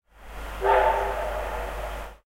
New Zealand Ja Class Locomotive coming into, stationed at and leaving Hamilton Station. Homeward bound to Auckland (Glennbrook) after a joint day trip to National Park and back. Recorded in very cold conditions with a sony dictaphone, near 10pm NZST.